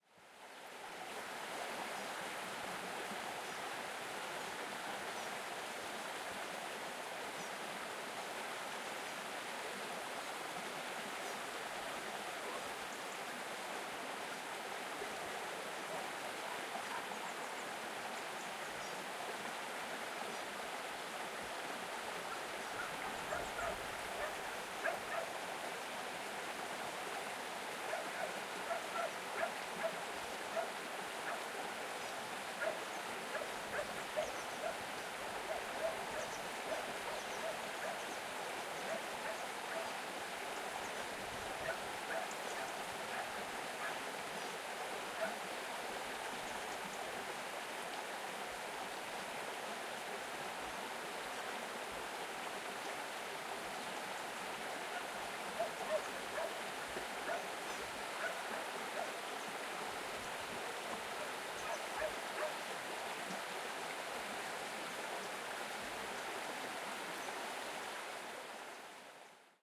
Alanis - Brook near the Chapel - Arroyo camino de la ermita (III)
Date: February 23rd, 2013
There's a brook in the road to a Chapel called 'Ermita de las Angustias' in Alanis (Sevilla, Spain). I recorded some takes in different parts of its stream.
Gear: Zoom H4N, windscreen
Fecha: 23 de febrero de 2013
Hay un arroyo en el camino a la ermita llamada "Ermita de las Angustias" en Alanís (Sevilla, España). Hice algunas tomas en diferentes partes de su recorrido.
Equipo: Zoom H4N, antiviento
Alanis, arroyo, Spain, river, grabacion-de-campo, liquid, Espana, liquido, brook, Sevilla, water, rio, field-recording, agua